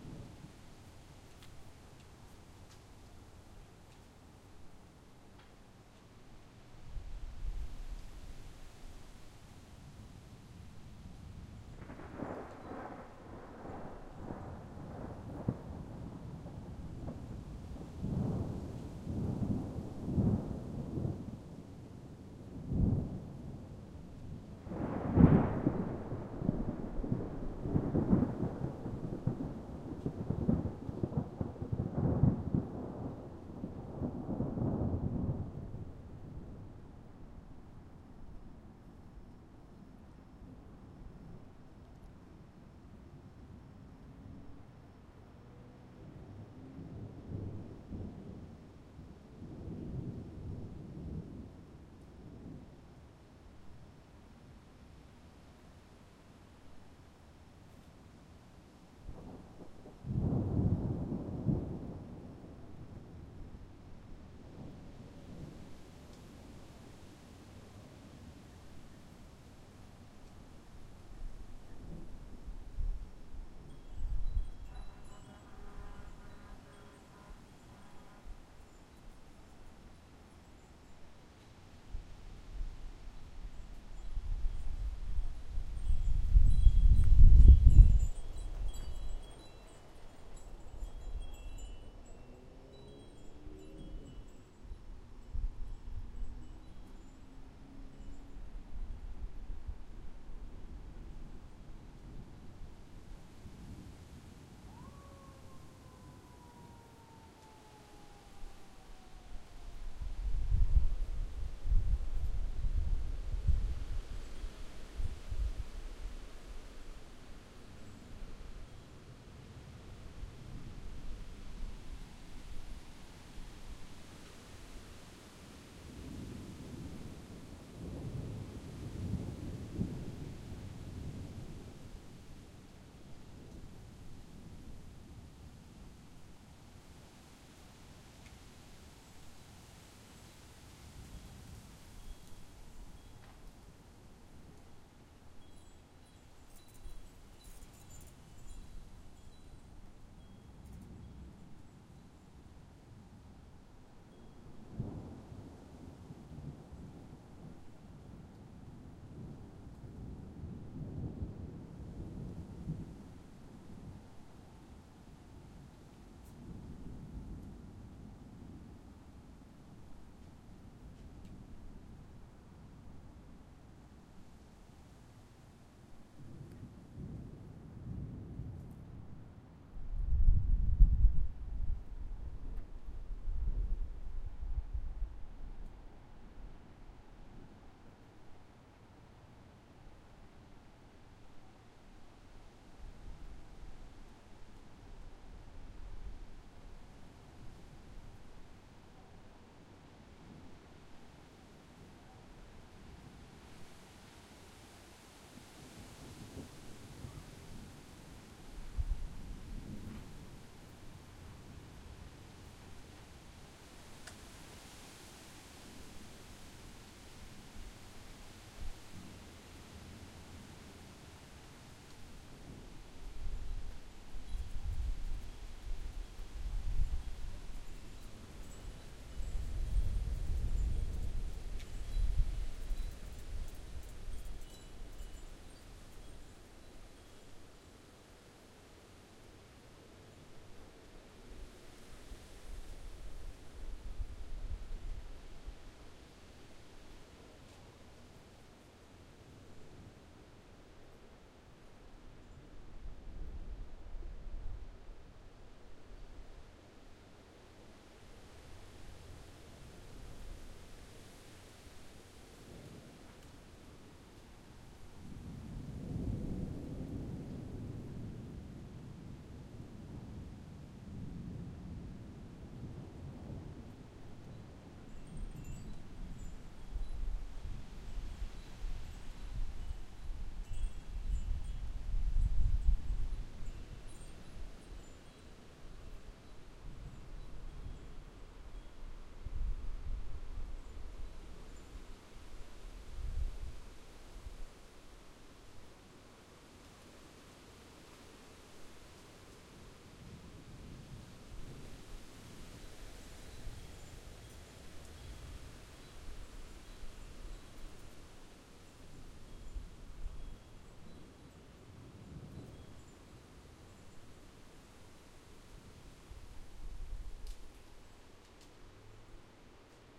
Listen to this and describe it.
Windy Stormy night
Almost stormy night in Texas. Small rolling thunder. Wind in trees. Some crickets. A distant fire truck and wind chimes. A few gust of wind blow on the microphone, but mostly clean. Zoom H4 - some microphone knocks cleaned up in Audition.